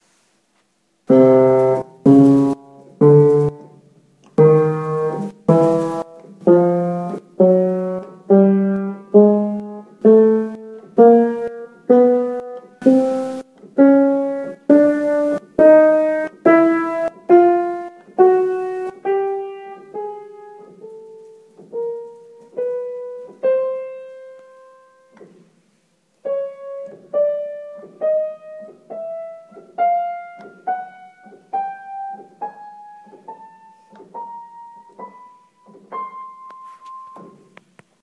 Piano sounds - individual keys as named
Instrument, Pitch